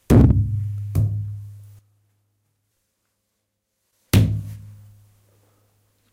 Punch a wall
Punch a bathroom wall recorded with tascam dr-05
hit, dr-05, fist, punch, punching, wall, slap, tascam, bathroom-wall, whip, hand, fight